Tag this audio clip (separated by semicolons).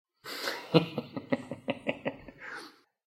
evil; human; laugh; laughing; scary; thriller; voice